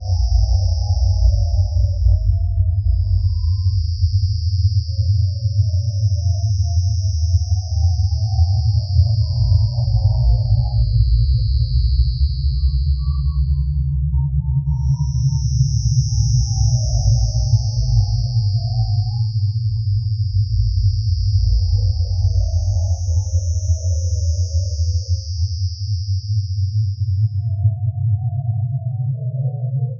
I was alone in the desert on another planet with a zoom recorder.
space, synth, atmosphere, alien, ambient, soundscape